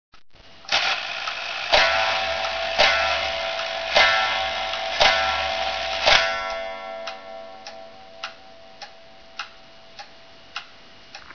My grandfather's old clock, probably >100 years.